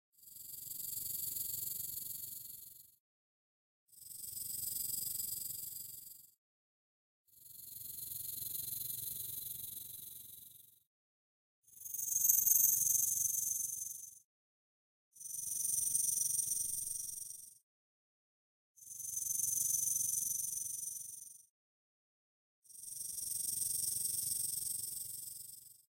Rattle snake hissing or shaking its tail at variable pitches.